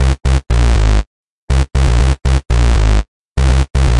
bass line loop
synth bass line